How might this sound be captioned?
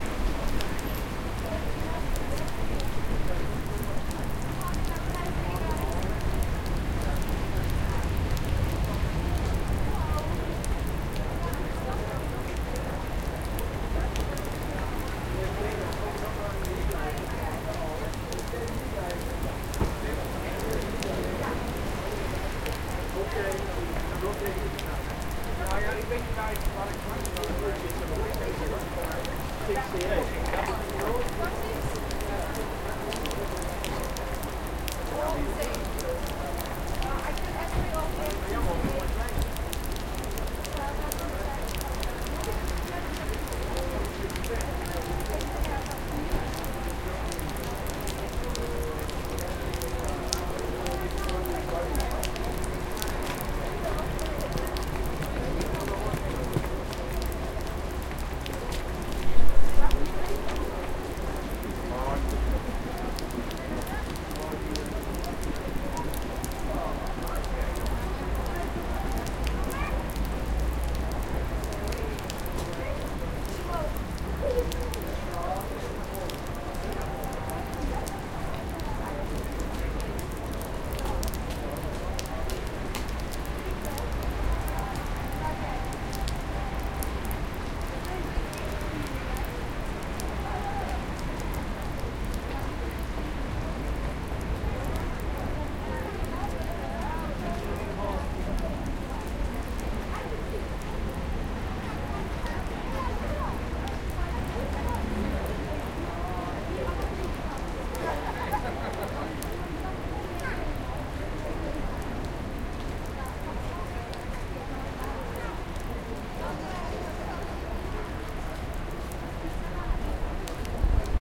The ambience at Schiphol Plaza after a small rainshower.